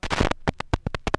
Various clicks and pops recorded from a single LP record. I distressed the surface by carving into it with my keys and scraping it against the floor, and then recorded the sound of the needle hitting the scratches. Some of the results make nice loops.
analog, loop, noise, scratch, glitch, record